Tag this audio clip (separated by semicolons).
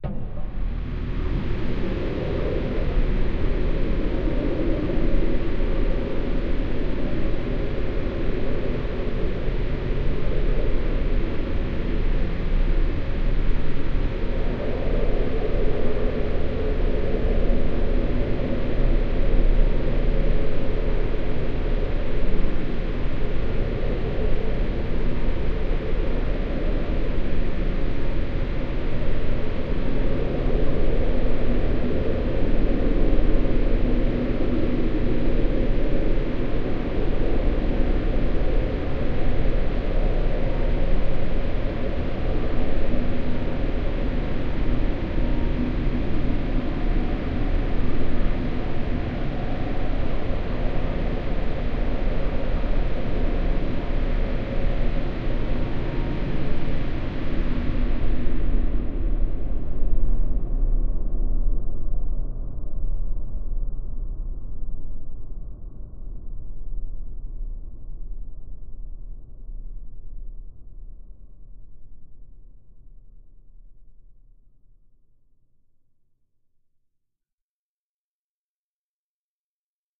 drone; pad; soundscape; multisample; artificial; organ